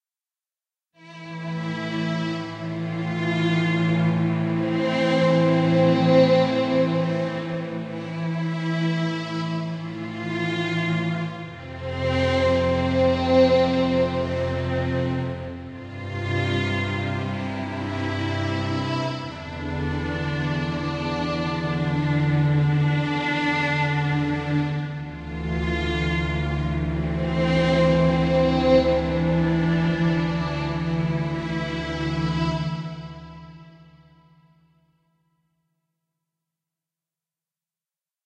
cinematic vio1
ambience, atmosphere, background-sound, cinematic, dramatic, film, hollywood, horror, mood, music, pad, scary, sci-fi, space, suspense, thrill, thriller, trailer